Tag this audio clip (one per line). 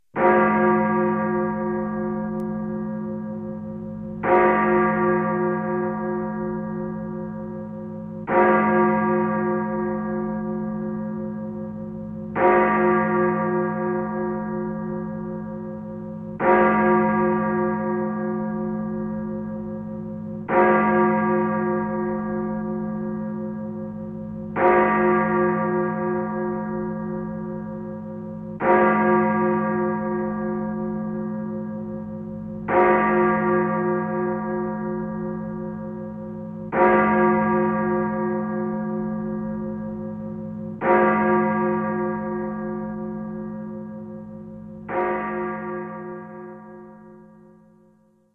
Field-recording
Gothic
Germany
Church-bells